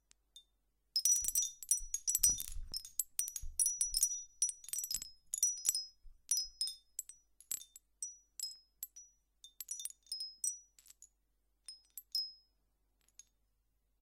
After 12 years it was time to contribute to this wonderful website. Some recordings of my mother's wind chimes.
They are wooden, metal, or glass and i recorded them with a sm7b, focusrite preamp. unedited and unprocessed, though trimmed.
I'll try to record them all.